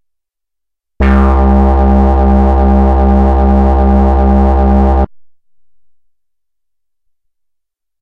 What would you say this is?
SW-PB-bass1-C2
This is the first of five multi-sampled Little Phatty's bass sounds.